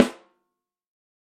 For each microphone choice there are eleven velocity layers. The loudest strike is also a rimshot. The microphones used were an AKG D202, an Audio Technica ATM250, an Audix D6, a Beyer Dynamic M201, an Electrovoice ND868, an Electrovoice RE20, a Josephson E22, a Lawson FET47, a Shure SM57 and a Shure SM7B. The final microphone was the Josephson C720, a remarkable microphone of which only twenty were made to mark the Josephson company's 20th anniversary. Placement of mic varied according to sensitivity and polar pattern. Preamps used were Amek throughout and all sources were recorded directly to Pro Tools through Frontier Design Group and Digidesign converters. Final editing and processing was carried out in Cool Edit Pro.